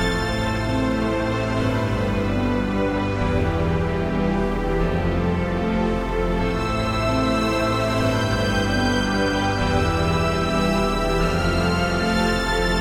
BarlEY Strings 1
New Orchestra and pad time, theme "Old Time Radio Shows"
ambient, background, oldskool, orchestra, pad, radio, scary, silence, soudscape, strings